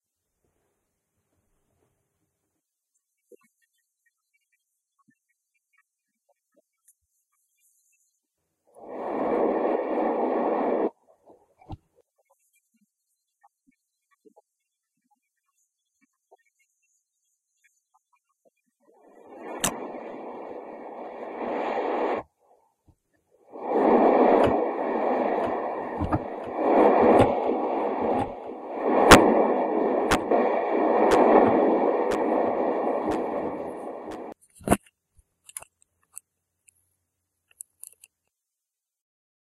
A recording of a Livingstone keyboard using a really bad voice recorder on a Samsung camera, and further painstakingly converted to stereo and filtered in Audacity. From a few years ago.